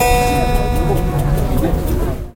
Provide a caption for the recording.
One key of an out of tune harpsichord on a flea market. Recorded on an Edirol R-09 with built-in mics.